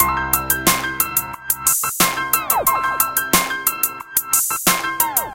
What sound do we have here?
country song002
country, dubstep, hip-hop, loop, synthesizer